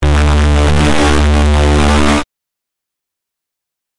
Break (hard)
A hard break-noice I created using the Grain app.
sfx, splitter